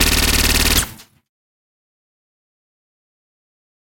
SciFi Gun - Laser Automatic Fast
Laser Automatic Fast